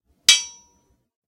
An anvil being struck by a metal hammer.